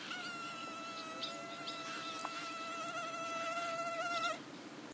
the unbearable buzz of a mosquito near your ear, marsh ambiance in background. Recorded near Centro de Visitantes Jose Antonio Valverde (Donana, S Spain) using Sennheiser MKH60 + MKH30 > Shure FP24 > Edirol R09 recorder, decoded to mid/side stereo with Voxengo free VST plugin